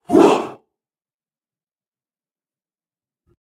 war cry of a crowd. I made this sound mixing my voice in multiple layers with some effects. Zoom H4n + adobe audition + free plugins
Add some echo, reverb, full stereo and have fun!